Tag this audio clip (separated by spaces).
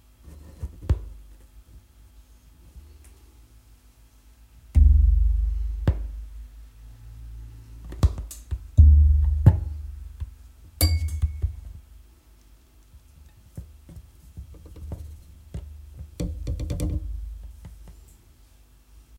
acoustic cello pickup unprocessed